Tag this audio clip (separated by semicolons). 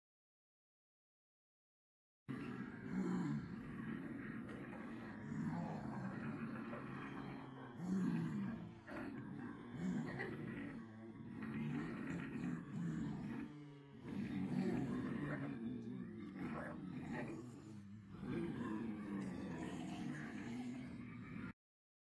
dead-season; ensemble; group; horror; monster; roar; snarl; solo; undead; voice; zombie